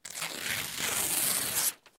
ripping paper with my hands